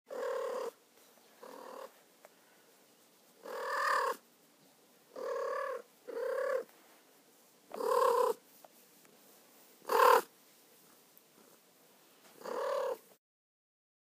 Cat trilling
One of our cats being very vocal. Recorded on iPhone 4s, processed in Reaper.
kitty, cat, trill